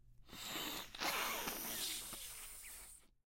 Sonido de esnifar
Sound of sniffing
esnifar, inhalar, inhale, oler, smelling, sniffing